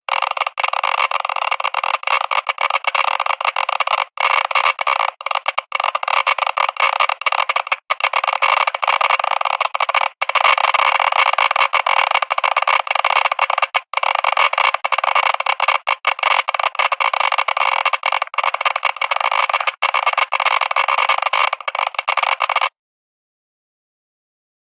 Radioactive Machine
Electric geiger counter ticking fast.
counter, digital, effect, electric, geiger, noise, radiation, radioactive, sound, tick, ticking